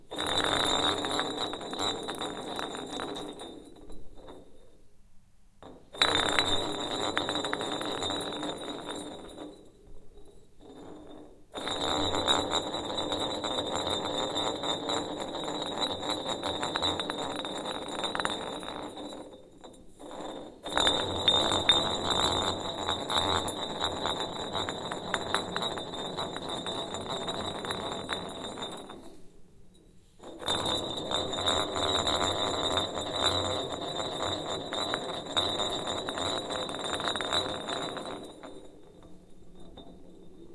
Afri cola turning wood floor
Almost (!) empty bottle of afri cola spinning and turning on wood floor, stereo recording
bode, bottle, drehen, Flaschendrehen, floor, glass, holz, spinning, turning, wood